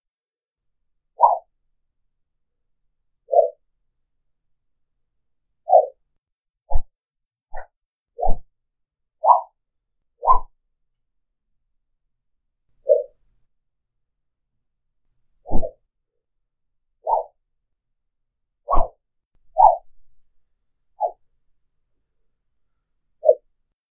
Swinging a Large Knife or Sword
Various sword or knife swipes. Recorded on MAONO AU-A04TC; literally created by swinging a short, sharp sword.
Action, Battle, Draw, Fantasy, Fast, Fight, Horror, Knife, Medieval, Sci-Fi, Sharp, Swing, Swish, Swoosh, Sword, Swords, Weapon